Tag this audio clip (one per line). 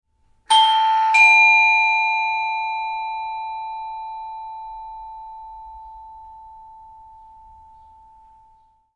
bell
door
doorbell
ringing
rings